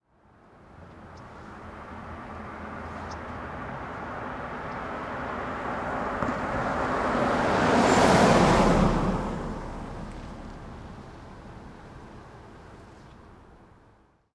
Car by slow DonFX
car by pass